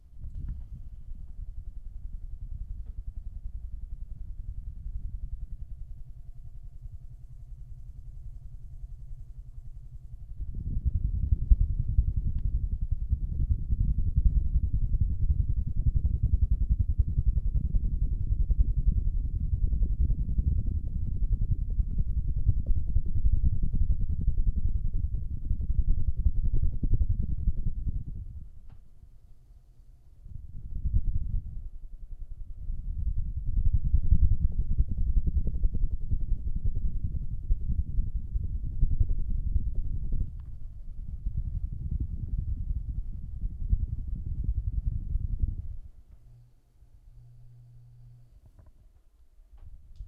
helicopter fx2
some fans I got with the h4n
sounds, fx, fan, helicopter